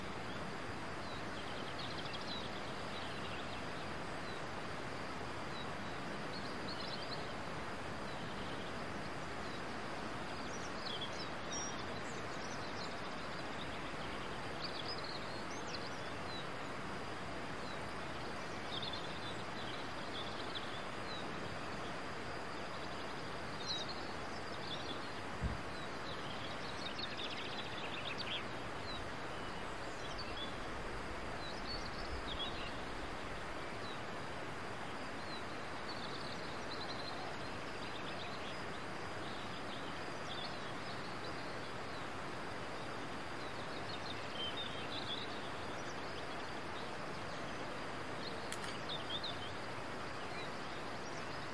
Stereo recording of the ambient from Edrada, Ourense, Galicia.